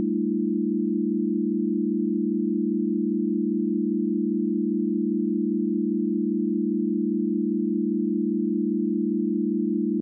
test signal chord pythagorean ratio